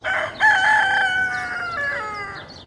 Kukeleku - Hamlet Bokhoven, Netherlands (Dutch)
Sound Engineer: Klankbeeld
Microphone Used: Rode NTG-1 shotgun in Rode Blimp
Mixer: Sound Devices 302
Recorder: Tascam dr-100 Mk2
Recorded in "Hamlet Bokhoven"